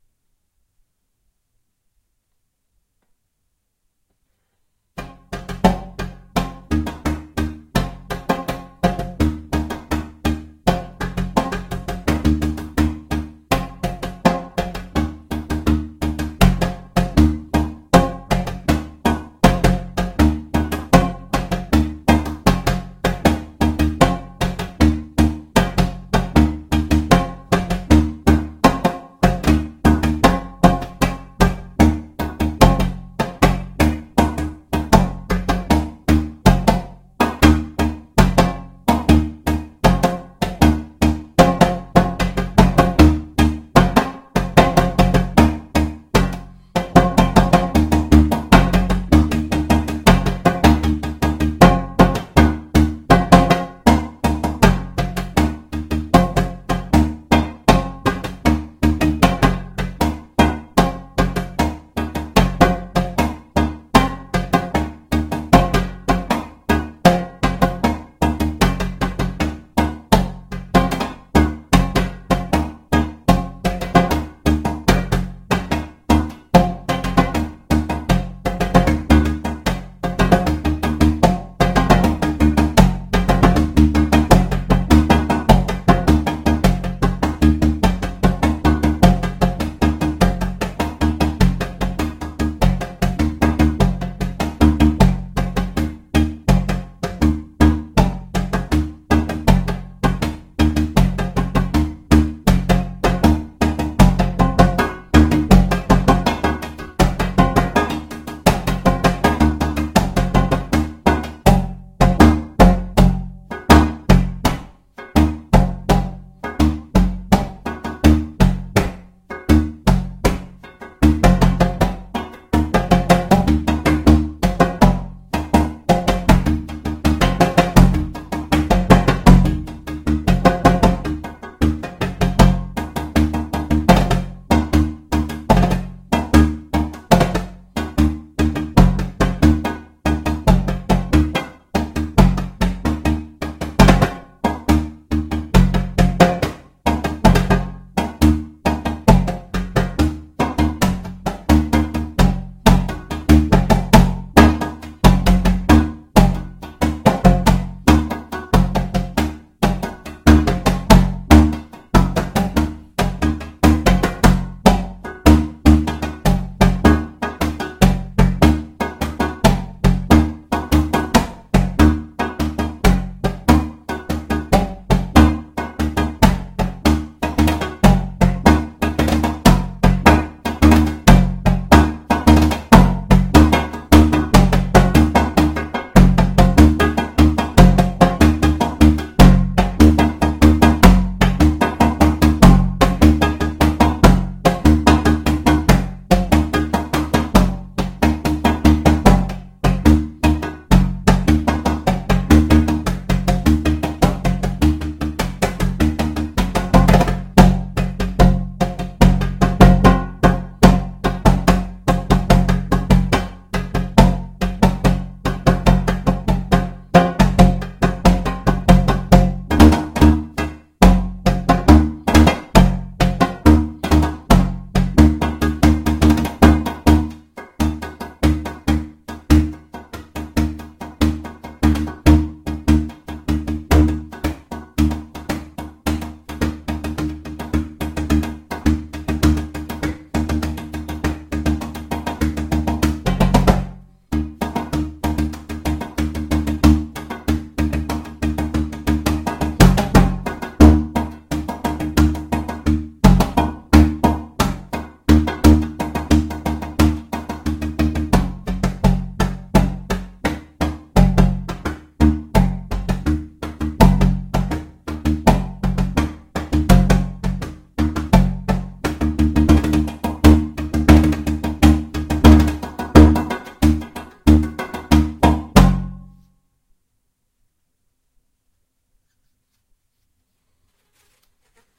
TwoDrumImprov 1 Jan 2019 Multitrack PitchChanges
Two djembes played by myself improvisationally. Sound has been modified by myself.
drum, djembe, beat, rhythm, pattern